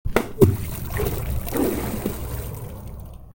Carlos R - Diving in a Pool
Sound of a person diving into a pool.
Diving, MUS152, Swimming